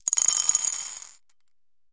marbles - 15cm ceramic bowl - drop - handful of ~13mm marbles 04
Dropping a handful of approximately 13mm diameter glass marbles into a 15cm diameter ceramic bowl.
bowl, ceramic, ceramic-bowl, dish, drop, dropped, dropping, glass, glass-marble, marble, marbles